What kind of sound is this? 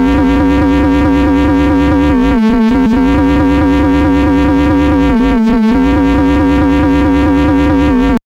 Experimental QM synthesis resulting sound.